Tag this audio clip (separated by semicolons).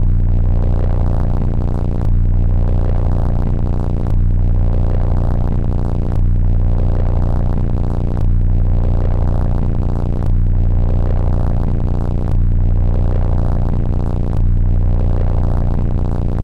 space; drone; artificial; soundscape; experimental; pad; loop; cinimatic